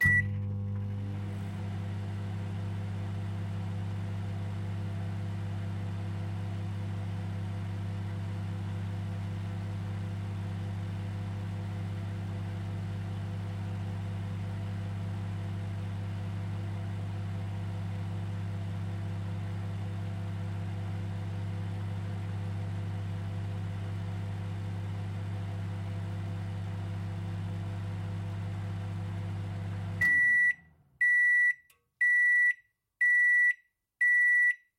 Microwave Beeps Starts Stops
Recording of microwave beeping, starting and stopping. Recorded using a Sennheiser 416 and Sound Devices 552.
beeps, household, kitchen, microwave, power, starts, stops